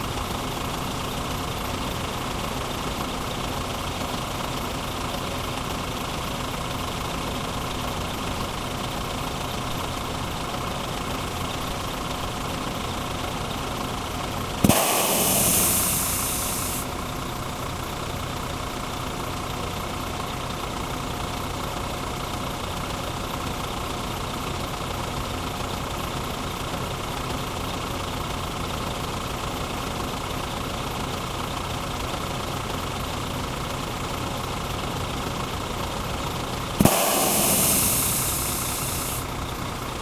Dźwięk opróżnianego kompresora w autobusie Solaris Urbino 12